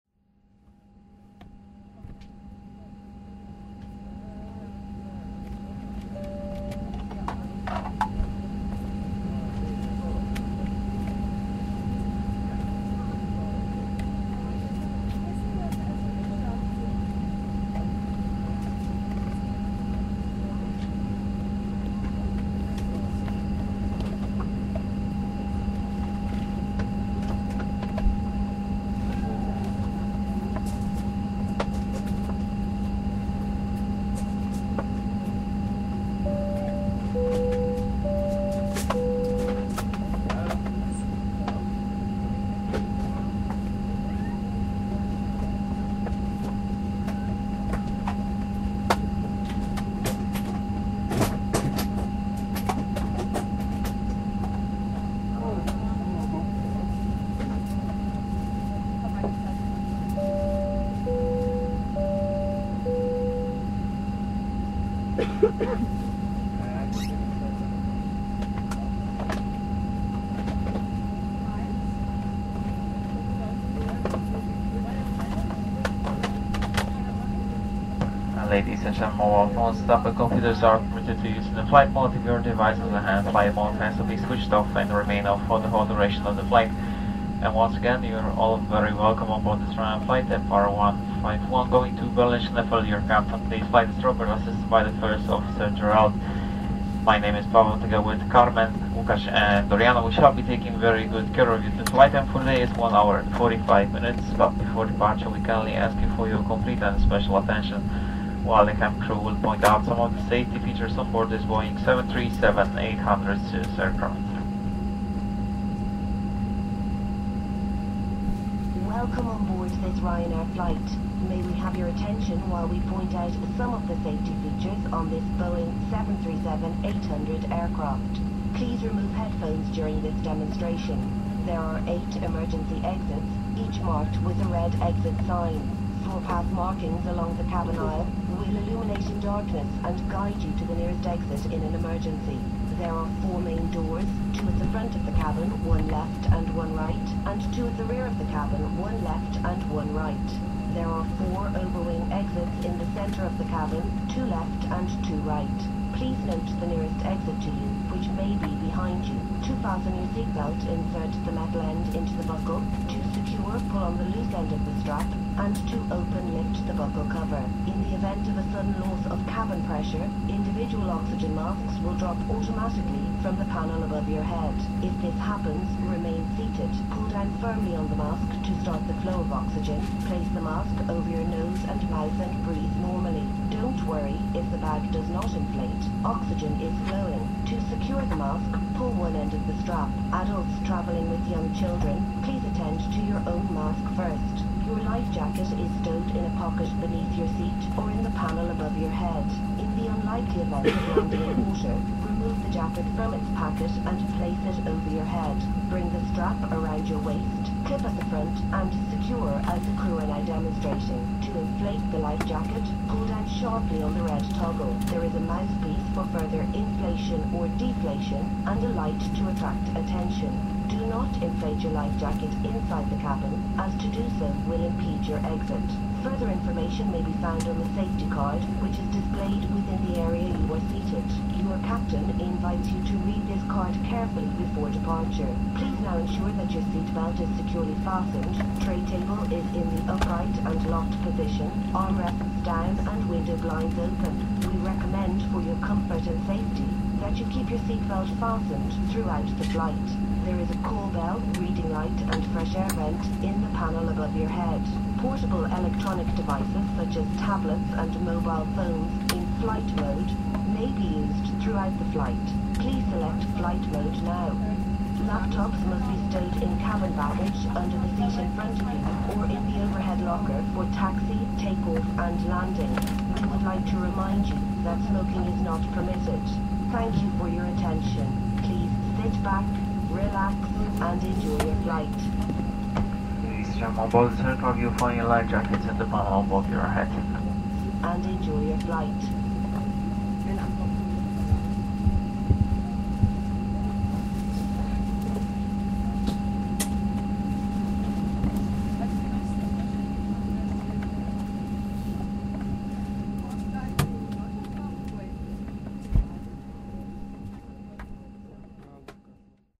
Glasgow safety demo 060716
06.07.2016: around 2:00 p.m. Airport in Glasgow - on the plane. General atmo/noise of the safty demo on the plane. Recorder - zoom h1, no processing.
field-recording, boarding, noise, plane, safty-demo, take-off, airplane, transportation